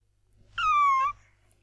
Kitten02amplified
domestic, animal, cat, meow, remix, kitten